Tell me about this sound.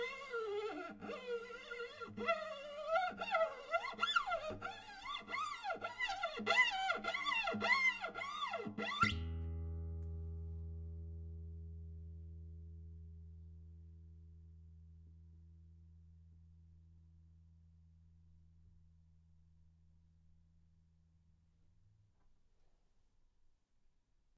Scratching the E-string on a acoustic guitar
scratch, sawing, guitar